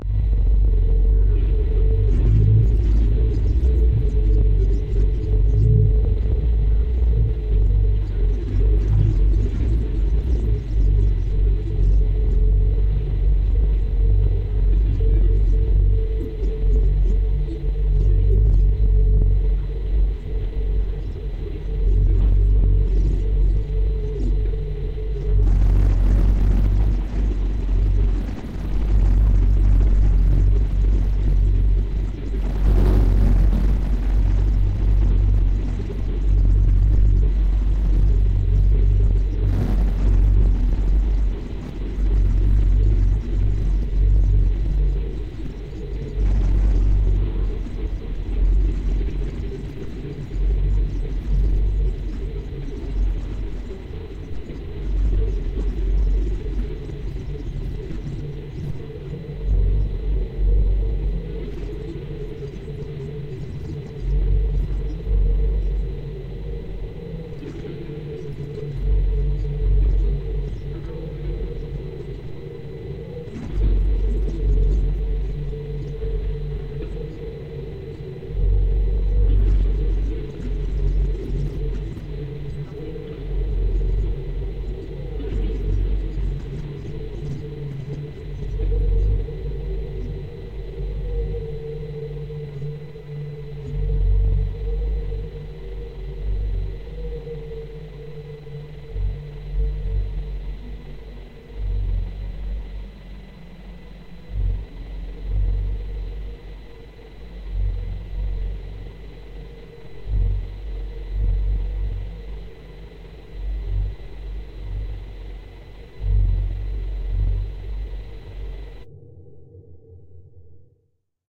Combination of two sound files, both created by generating a feedback
loop with my mixer and a Boss digital delay stompbox. The two files
were recorded in my studio with a SM57 and a minidisk. One file is
pitched down, the other is pitched down and processed, using DFX
Geometer. The result is a low hum with some hiss on top of it and in
the background there are some shuffling and more grainy sounds.
rumble, low, hum, drone